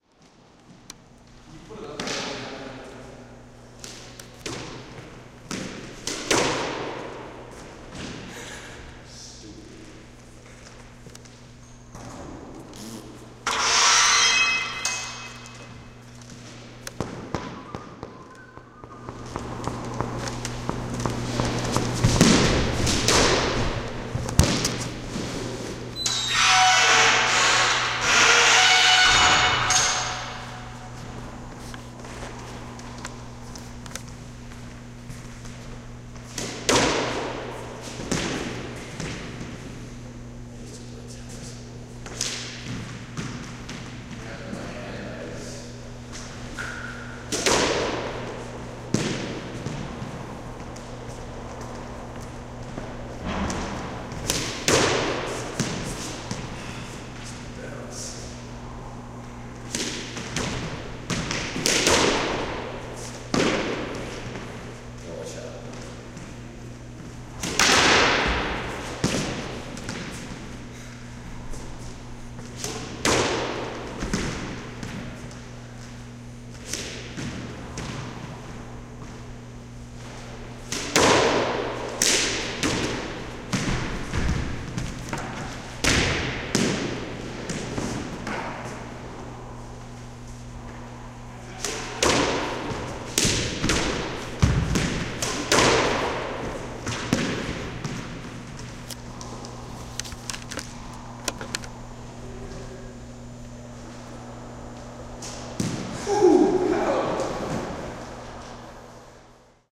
je racketballcourt
Game of squash in a resonant squash court, recorded with a binaural microphone